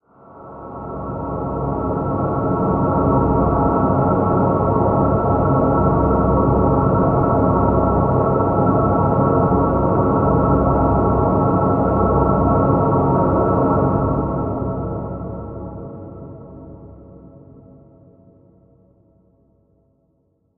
Dramatic choir 1
Deep and dark dramatic choir with alot of disonances. Perfect for dreamy scenes
choir, dark, deep, disonantic